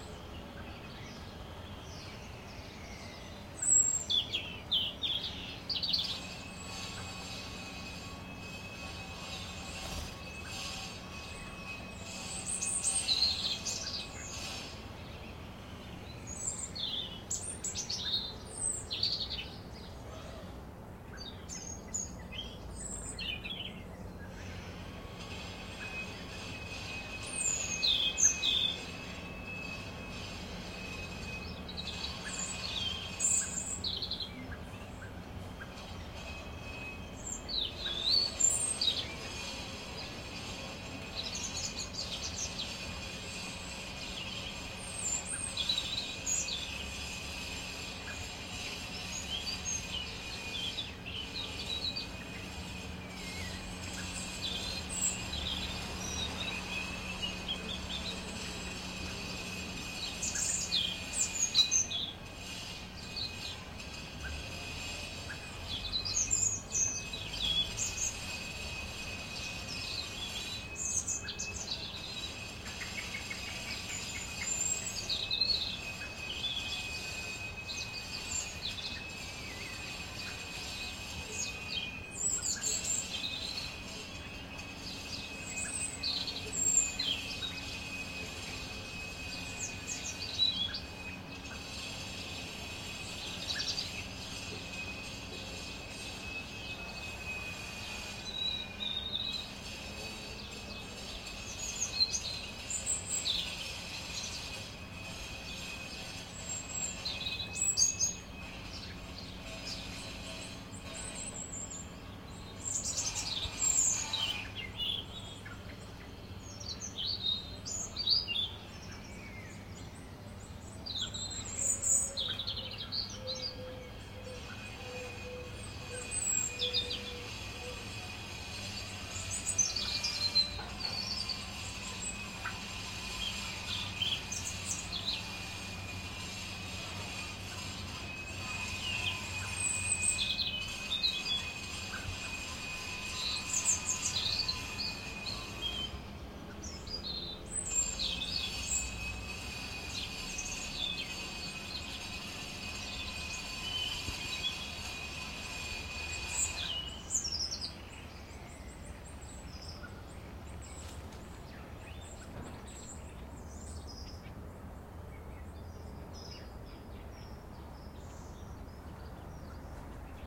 morning : songs of birds mixed with that of a circular saw